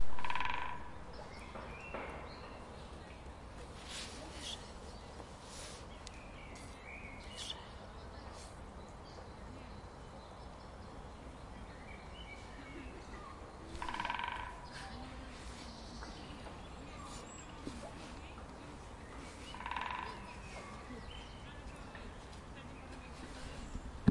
This is a bird, dendrocopos major in a small forest. This was recorded Zoom H2N (XY).
Dendrocopos, animal, bird, bisque, dzieciol, field-recording, forest, major, nature, relaxing